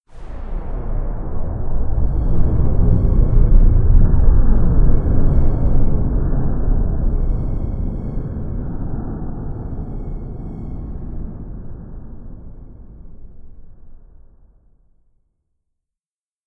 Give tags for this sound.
metallic
sci-fi
weird
alien
strange

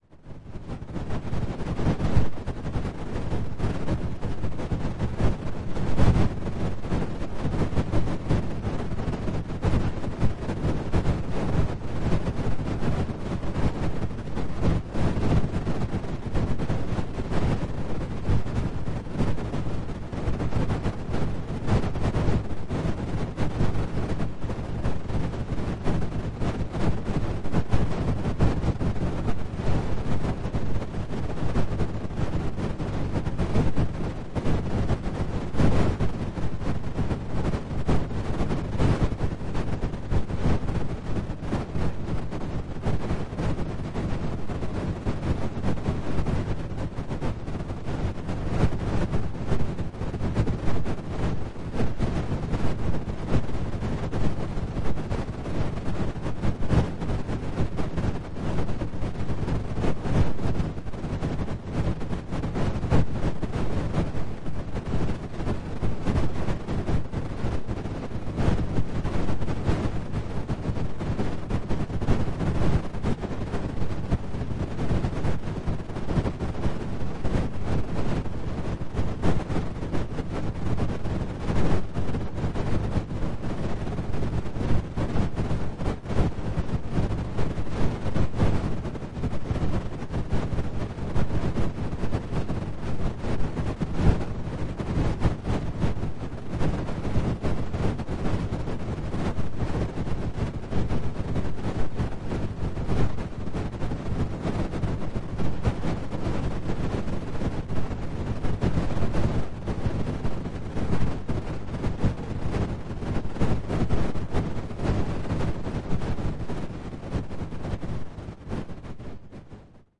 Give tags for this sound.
drone
soundscape
reaktor
electronic
noise

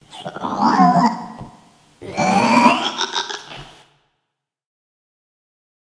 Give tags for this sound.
demon
demon-pig
demons
devil
frightful
hell
phantasm
phantom
pig
satan
satanic
sinister
spectre
wierd

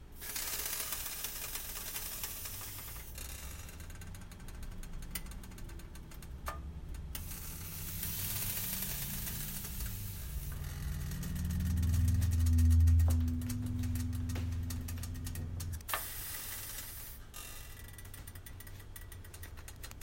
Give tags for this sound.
creaking
ship
stove

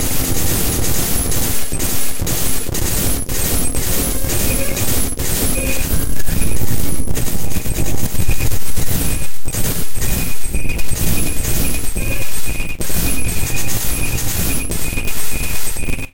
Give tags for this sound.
electronic
fubar
noise
processed

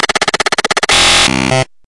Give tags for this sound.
annoying
computer
damage
digital
error
file
glitch
noise
noise-channel
noise-modulation
random
sound-design

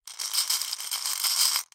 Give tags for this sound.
marbles
glass
bowl
shake

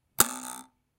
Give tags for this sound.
arrow
blade
blade-sound
field-recording
knife
knife-sound
recording

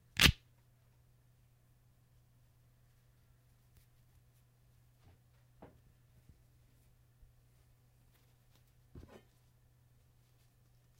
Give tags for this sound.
flesh
rip
tear

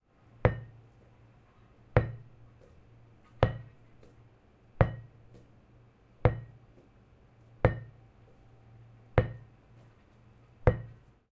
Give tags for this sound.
Elaine
Field-Recording
Park
Point
University

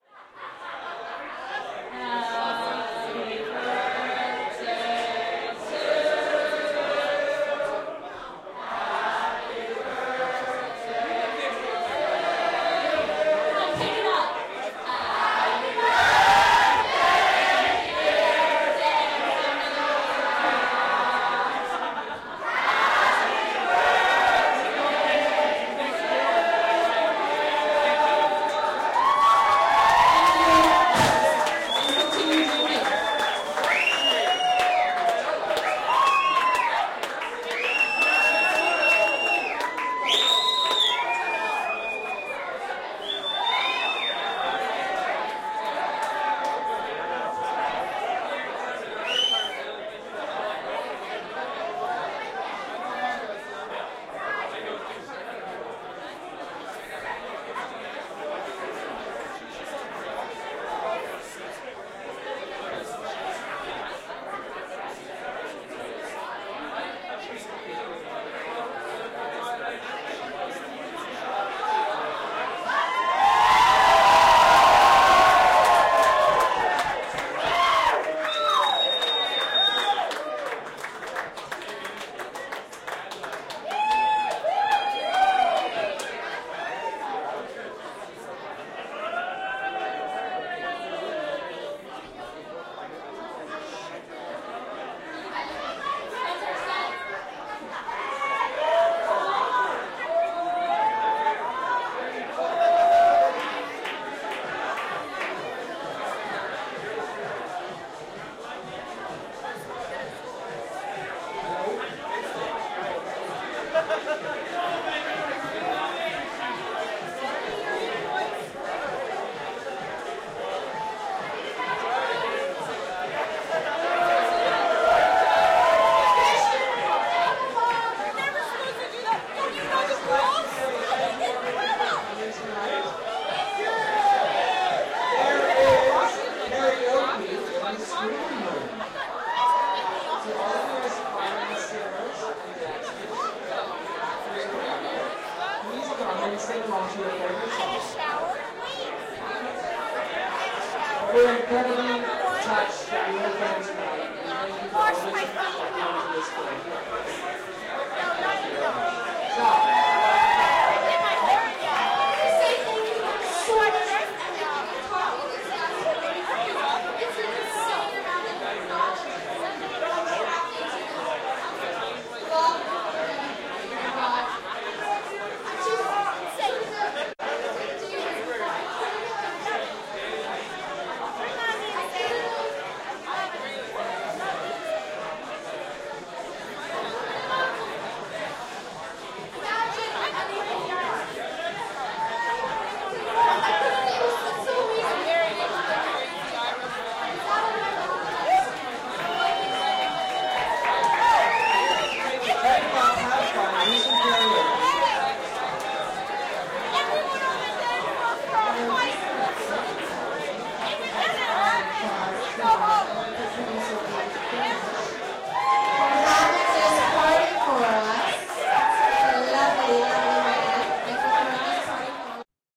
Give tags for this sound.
club
crowd
happy-birthday
singing

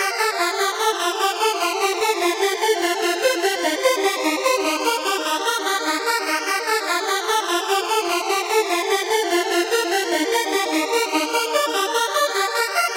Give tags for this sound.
techno
trance
dance
melodie
flanger
synth
trumpet
148bpm
loop

